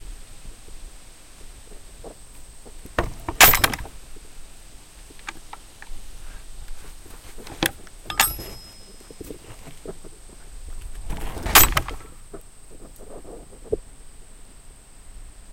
Gate to outdoor horse pen opens and closes. Gate slams shut.